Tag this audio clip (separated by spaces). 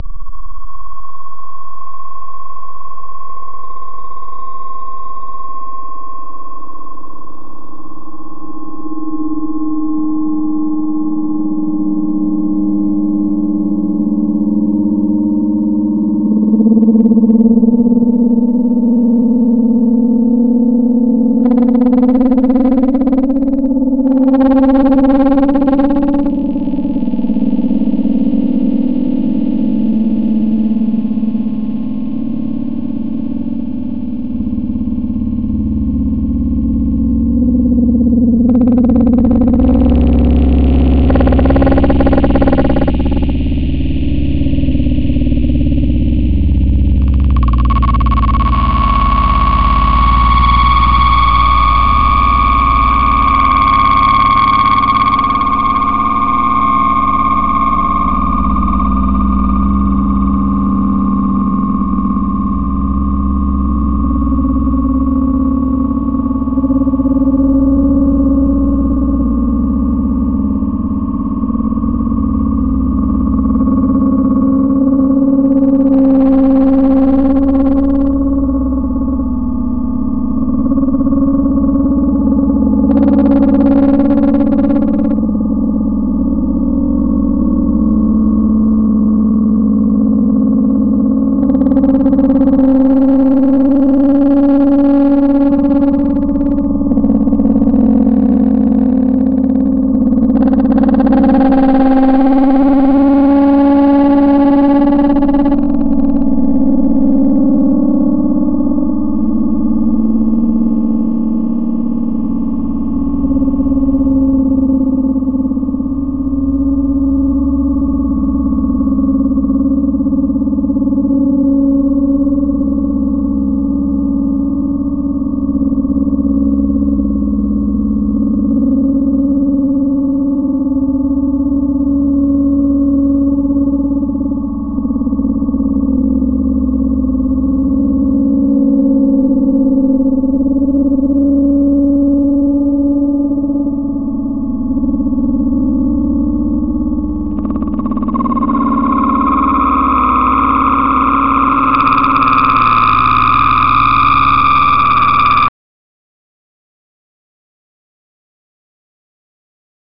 mood scary soundscape space-exploration